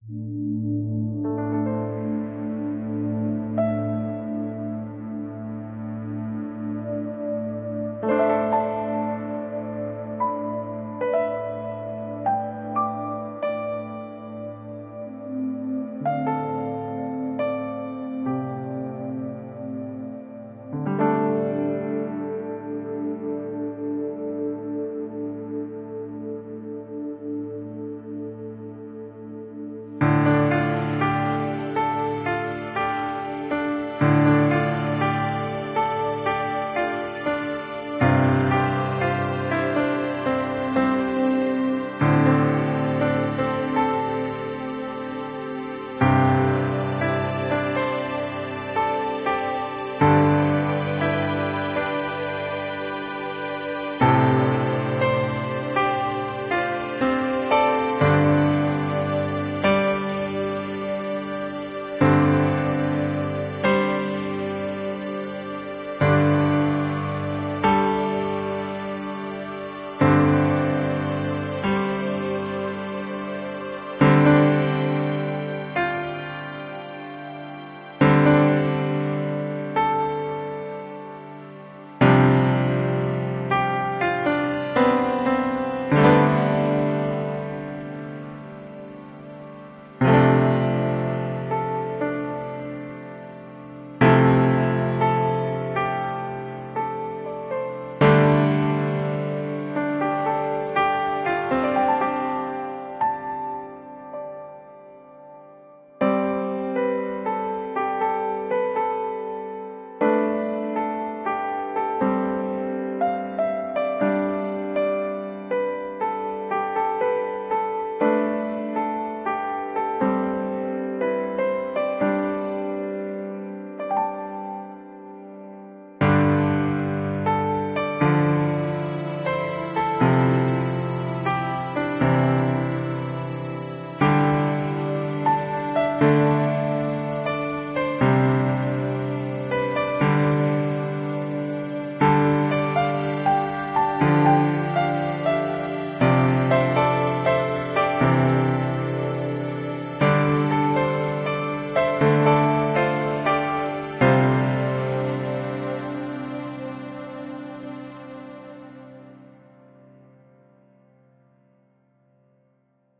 pianomotive (with strings)
A calm piano musical motive that can be used as a soundtrack. This version contains simple strings lines to add some complexity.